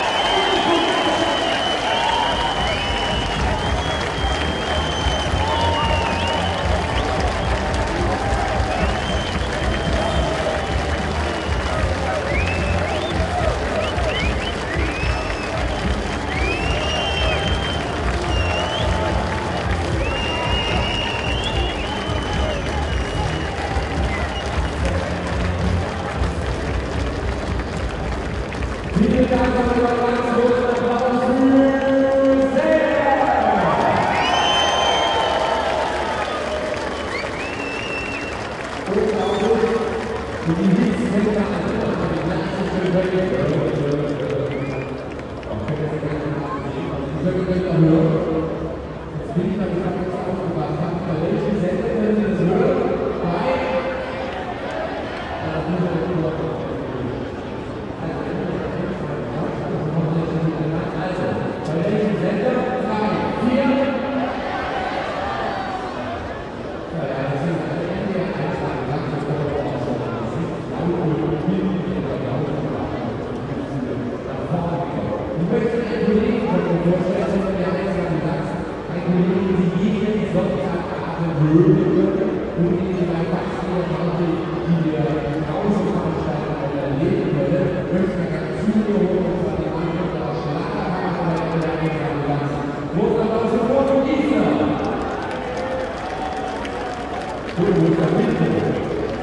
These recordings were made at the annual "Tag der Sachsen" (Saxony Fair) in Freiberg. Recordings were done on the main market square (Obermarkt), where a local radio station had set up a large stage for concerts and other events.
Recording was done with a Zoom H2, mics at 90° dispersion.
This is just after the main venue (a concert by the band "Sailor"), at around 11 PM, people are cheering frenetically, an announcer starts talking.